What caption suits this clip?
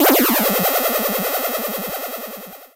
7th fail sound